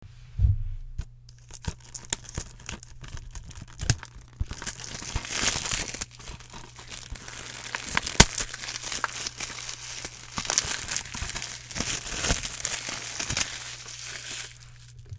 removing plastic
Took plastic off of a dvd in order to create this noise